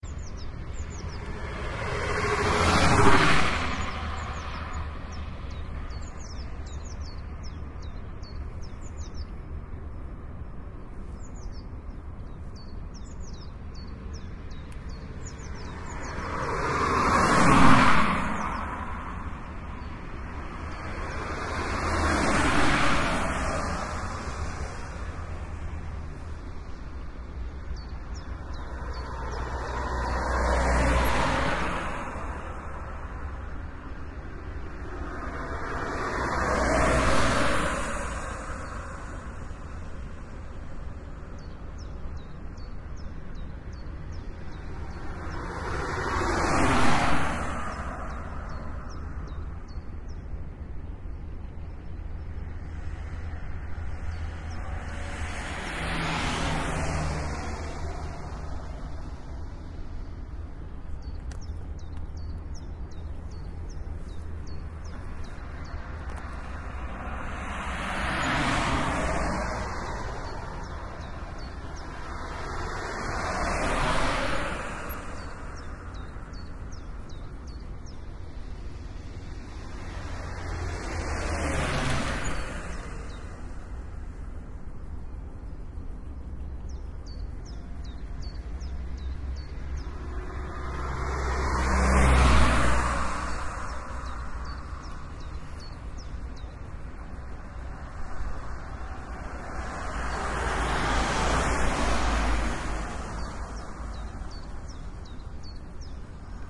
Rondweg Houten in the early morning